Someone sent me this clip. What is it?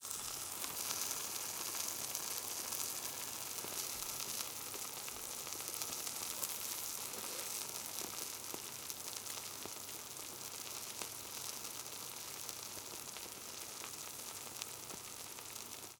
foley,kitchen,toaster,cheese,sizzle,melt,boil,alchemy,concoction,rpg,potion 01 M10
My toast is ready to be consumed and you can hear it.